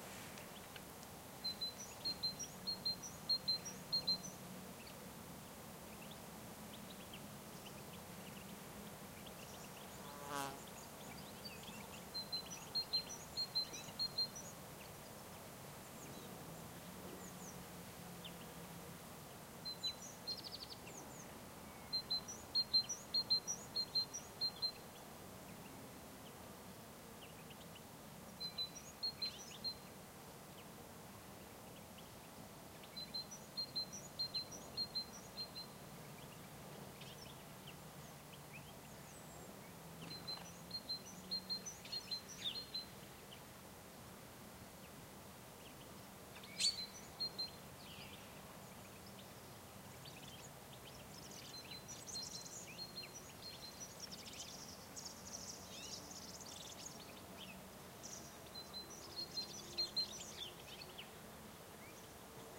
20061230.great.tit
call of a Great Tit
ambiance, birds, chirps, field-recording, nature, south-spain, winter